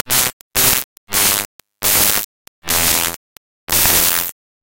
created by importing raw data into sony sound forge and then re-exporting as an audio file.